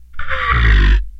beast-sigh-03
friction, idiophone, wood, daxophone